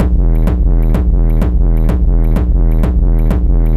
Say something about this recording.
Propellerheads Reason
rv7000
3 or 4 channels, one default kick, others with reverb or other fx.